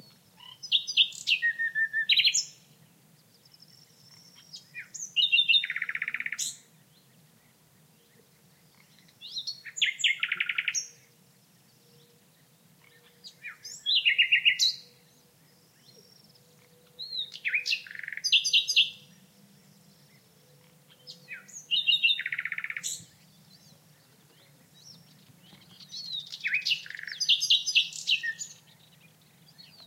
nightingale inside a willow hedge, in the morning /ruiseñor dentro de un seto de sauces, por la mañana
birds, field-recording, nature, nightingale, south-spain